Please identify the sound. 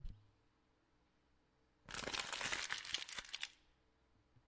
paper crumpling
Paper being crumpled slowly
crumple, OWI, paper